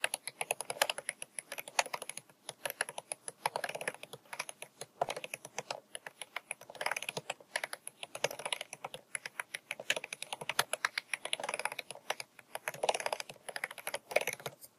Continuous scrolling on an old mouse
Like someone's reading the world's longest webpage.
mouse, wheel, computer, scroll-wheel